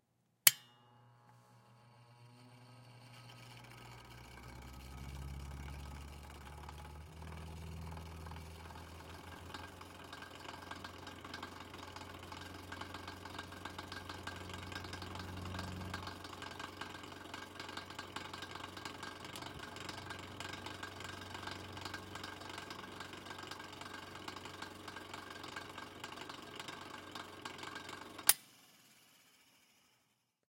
Antique Fan turned on and off then running. Recorded on a Zoom H4. A couple of extra switch clicks thrown in fro good measure.